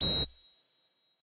Dont ask, just experimental sounds made by filters at simple waveforms.